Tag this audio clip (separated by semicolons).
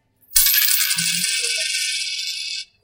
money; coins; cash